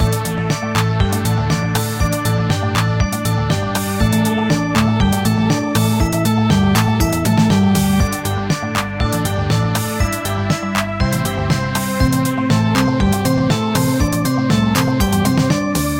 made in ableton live 9 lite
- vst plugins : Alchemy, Strings, Sonatina Choir 1&2, Organ9p, Microorg - Many are free VST Instruments from vstplanet !
you may also alter/reverse/adjust whatever in any editor
gameloop game music loop games organ sound melody tune synth happy